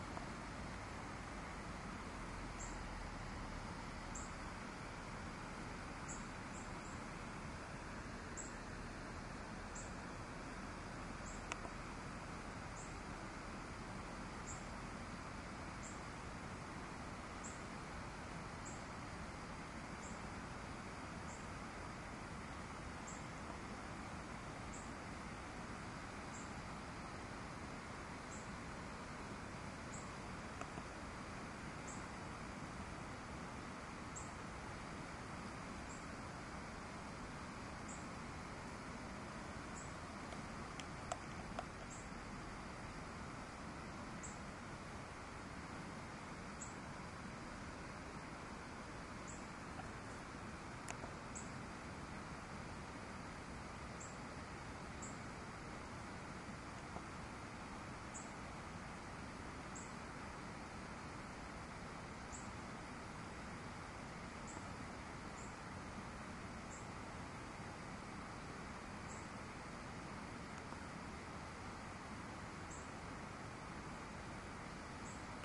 river and birds
this a river and there wer birds around me
river, water, waterfall